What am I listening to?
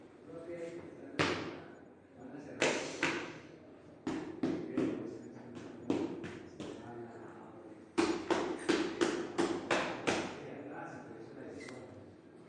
Museo Nacional-Toma Combinada- 2 mic-Evelyn, Daniel-orificio del orfebre-10 03 2020
Toma combinada a dos microfonos grabada en la bóveda que se encuentra en el edificio del orfebre del museo nacional en Bogotá. Para la realización de esta toma se utilizó un teléfono celular Samsung Galaxy A10 y LG Q6. Este trabajo fue realizado dentro del marco de la clase de patrimonio del programa de música, facultad de artes de la Universidad Antonio Nariño 2020 I. Este grupo está conformado por los estudiantes Natalia Niño, Evelyn Robayo, Daniel Castro, David Cárdenas y el profesor David Carrascal.
paisajesonoro, soundscape